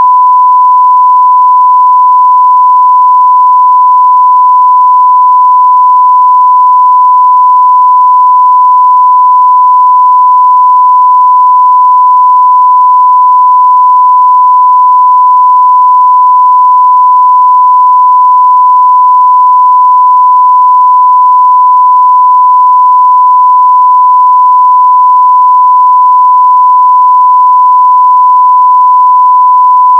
1kHz (30 seconds)
One killer hurts. For thirty seconds. For if someone is swearing A LOT or for a TV test pattern.
SMPTE colour bars- typical test pattern/testcard.
Well at least I finally got round HTML...
1kHz, beep, bleep, censor, censorship, explicit, foulmouthery, sine, swearing, television, test, testcard, test-pattern, tone, tv